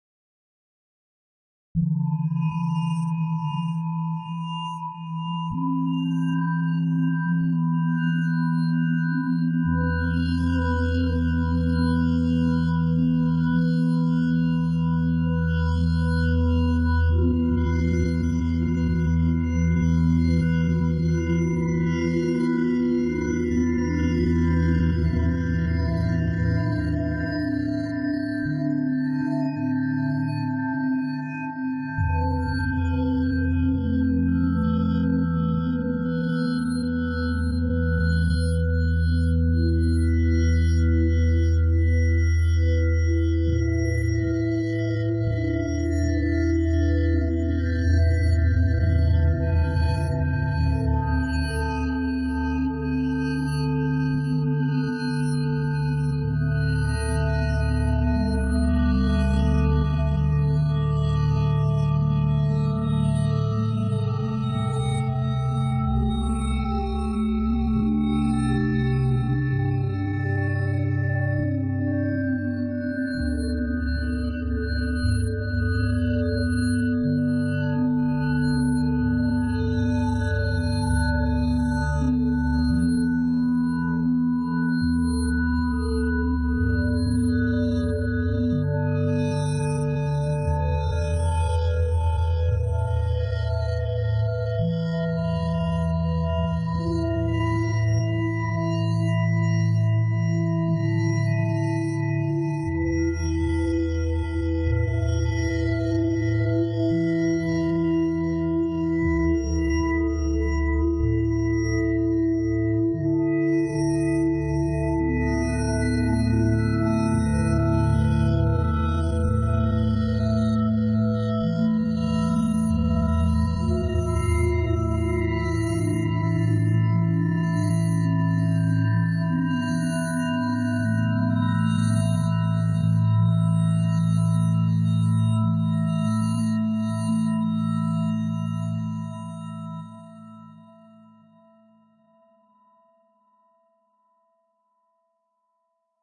A drone soundscape generated in the u-he software synthesizer Zebra, recorded to disk in Logic and processed in BIAS Peak.